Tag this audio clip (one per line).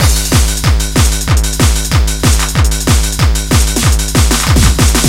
188bpm
beat
break
breakbeat
drum
hard
hardcore
loop
techno
tr909
trace